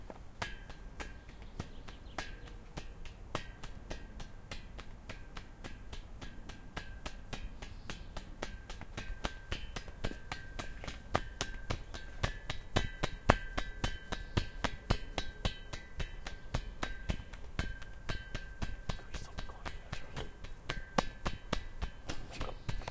Fence Trill
You know when you bash a fence with stick or something. This is that